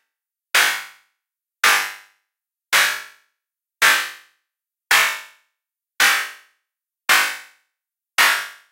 A feedback-induced rattling noise on the upbeat.
percussion, 110bpm